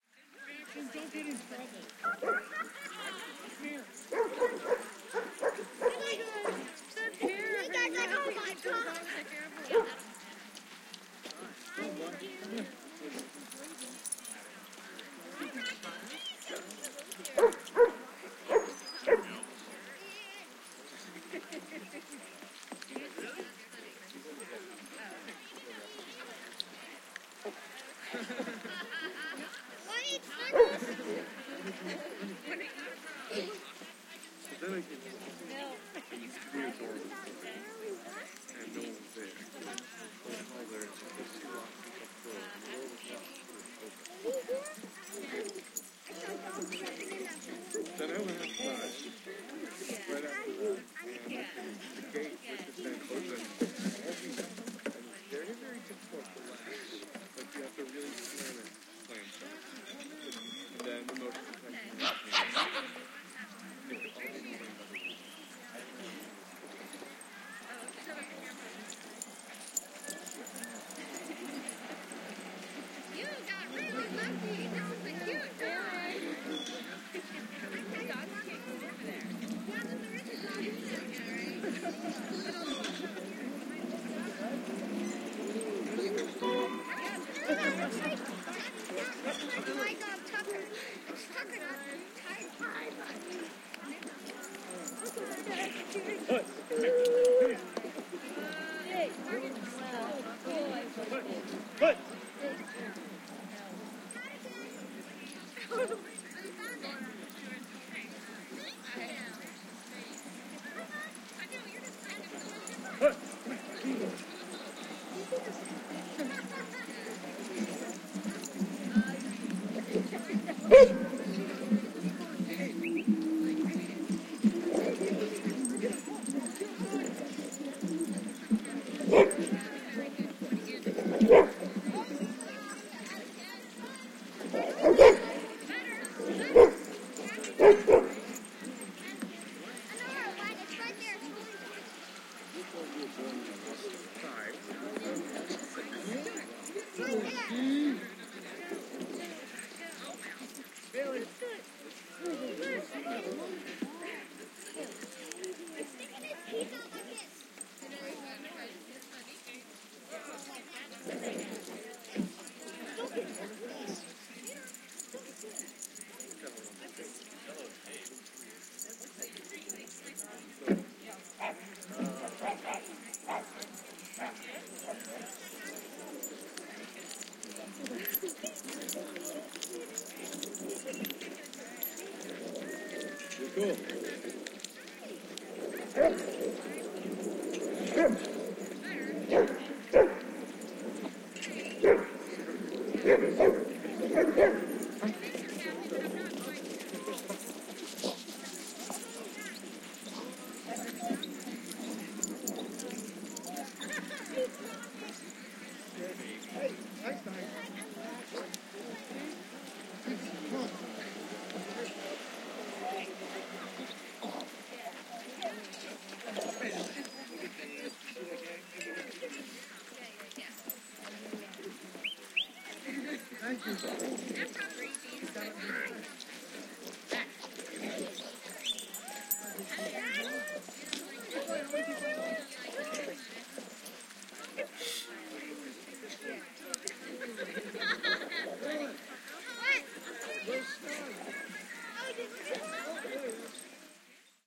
AMB S Dog-Park Busy 003
I set up my recorder in multiple areas of a busy dog park in Los Angeles. Lots of barking, playing, and general dog and owner sounds.
Recorded with: Sound Devices 702t, Beyer Dynamic MC930 mics.
ambiance, bark, barking, city, dog, park, people, playing, walla